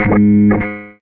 PPG 021 Fretless LeadSynth G#2
The sample is a part of the "PPG MULTISAMPLE 021 Fretless LeadSynth"
sample pack. It is a sound similar to a guitar sound, with some
simulated fretnoise at the start. Usable as bass of lead sound. In the
sample pack there are 16 samples evenly spread across 5 octaves (C1
till C6). The note in the sample name (C, E or G#) does indicate the
pitch of the sound but the key on my keyboard. The sound was created on
the Waldorf PPG VSTi. After that normalising and fades where applied within Cubase SX & Wavelab.
bass, lead, multisample, ppg